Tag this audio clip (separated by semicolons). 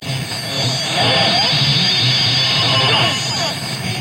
Electronic; Machines